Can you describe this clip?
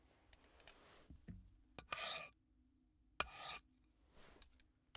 Scraping Wood v2
Just someone scraping wood
brush, brushing, grinding, pull, scraped, wood